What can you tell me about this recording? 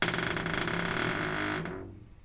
Door creaking.
Mic: Pro Audio VT-7
ADC: M-Audio Fast Track Ultra 8R
See more in the package doorCreaking
creaking, door-creaking, noise